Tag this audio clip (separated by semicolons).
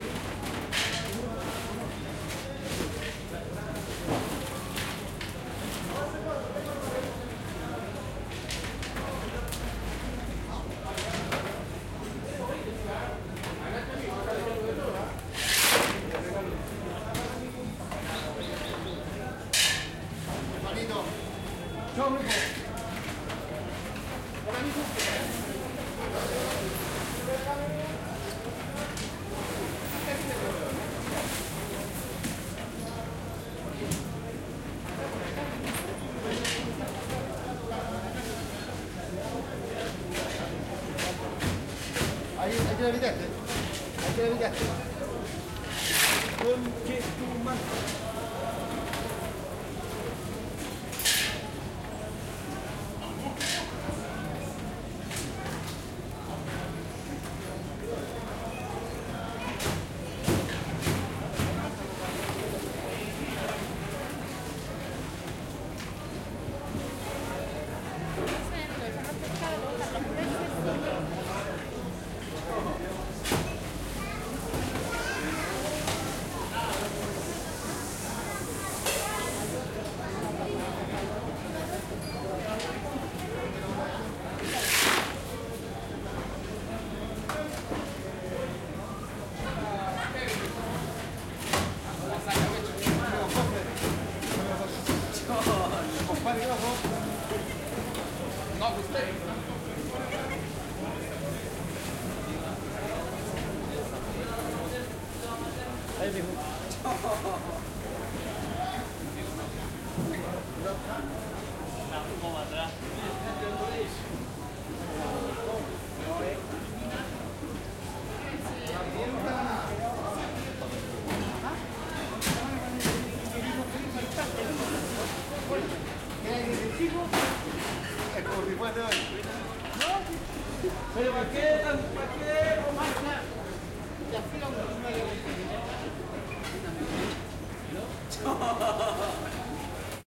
central; chile; food; market; mercado; ostras; oyster; restaurant; santiago; sea